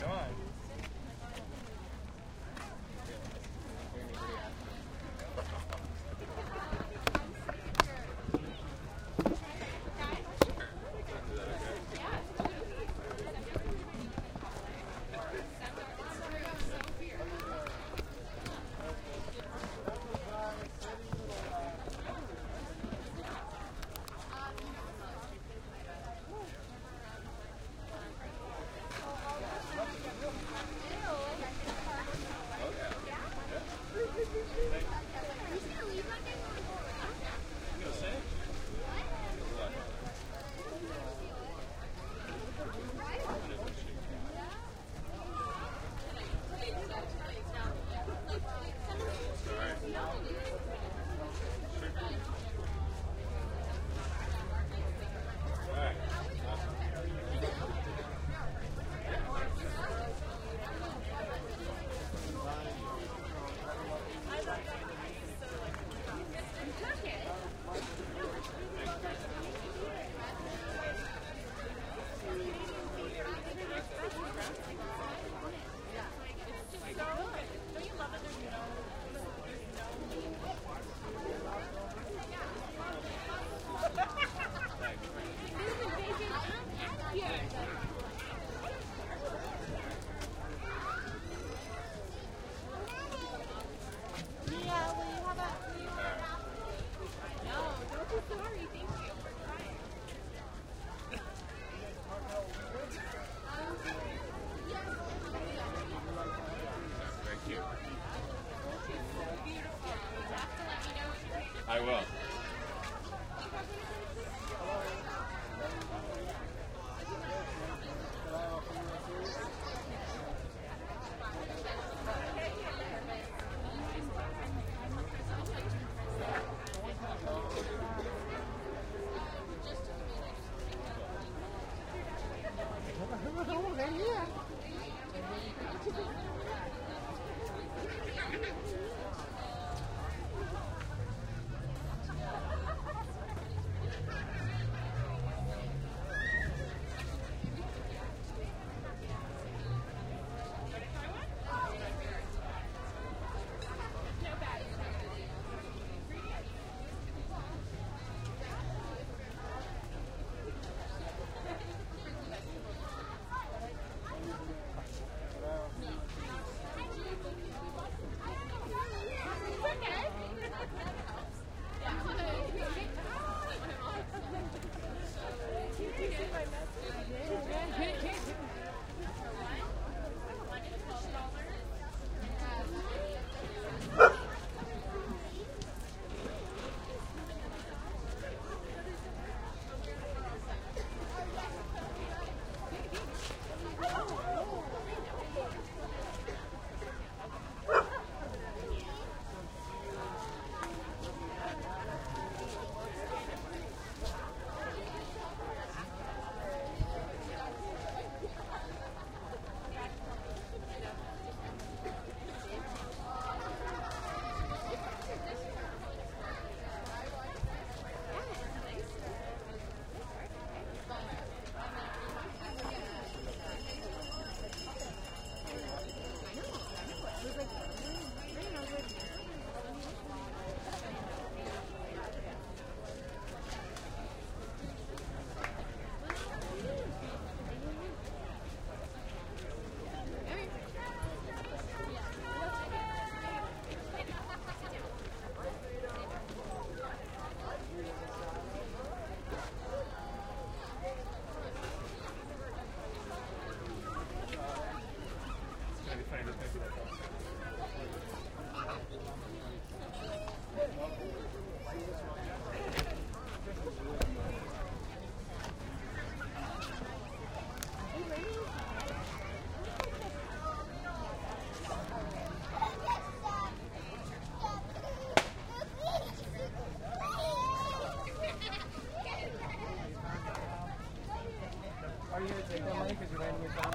farmers market
People milling about buying and selling produce and other typical farmer's market stuff. Kids and adults. Recorded on a Sony M10. Toronto, Ontario, Canada
market, toronto, farmers, community, outdoor, summer, produce